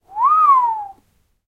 Awe Whistle, A (H6 MS)
Raw audio of an awe whistle - the sort of whistle you would make if you saw something incredible that takes your breath away. Recorded simultaneously with the Zoom H1, Zoom H4n Pro and Zoom H6 (Mid-Side Capsule) to compare the quality.
An example of how you might credit is by putting this in the description/credits:
The sound was recorded using a "H6 (Mid-Side) Zoom recorder" on 17th November 2017.
awe, H6, whistle, whistling, wow